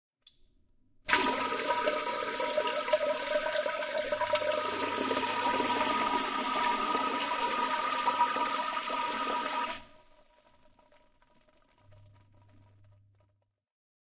20190102 Spraying Water into the Toilet 05
Spraying Water into the Toilet
toilet, water